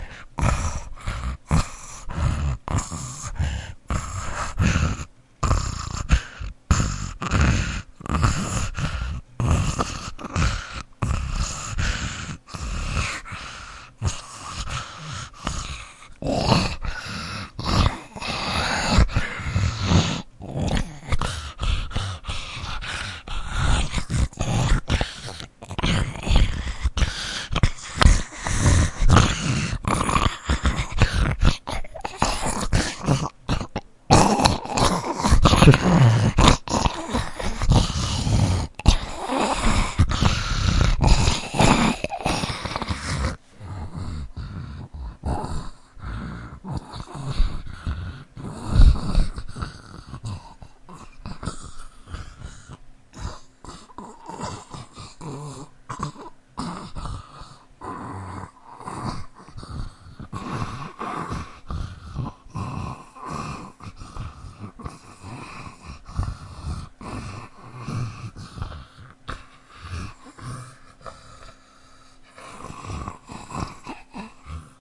Zombie breathing
breathing, dead, horror, Zombie